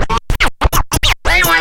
Cut scratching a vocal phrase. Sounds like "u o h o o anyone". Technics SL1210 MkII. Recorded with M-Audio MicroTrack2496.
you can support me by sending me some money: